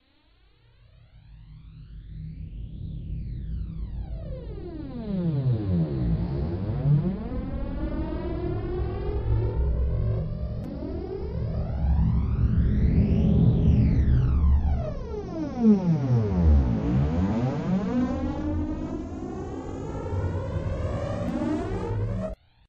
laser spaceship digital